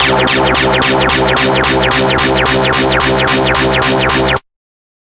30-8th Dubstep Bass c3
Dubstep Bass: 110 BPM wobble at 1/8th note, half of the samples as a sine LFO and saw LFO descending. Sampled in Ableton using massive, compression using PSP Compressor2. Random presets with LFO settings on key parts, and very little other effects used, mostly so this sample can be re-sampled. 110 BPM so it can be pitched up which is usually better then having to pitch samples down.
effect, beat, sub, lfo, porn-core, dance, bass, 110, loop, wub, wobble, synth, rave, dubstep, sound, noise, processed, Skrillex, techno, electro, dub, synthesizer, bpm, electronic, dub-step, club, wah, trance